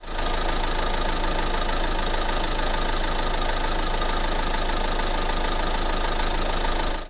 Raw exterior audio of the engine ambience from a Renault Grand Scenic.
An example of how you might credit is by putting this in the description/credits:
The sound was recorded using a "H1 Zoom V2 recorder" on 18th April 2016.